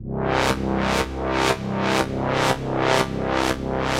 warpy loop
Warpy pulsing sound loop